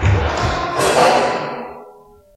Swink-boat
Bonks, bashes and scrapes recorded in a hospital at night.
hit, hospital, percussion